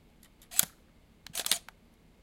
A camera taking a picture with the sound of the flash.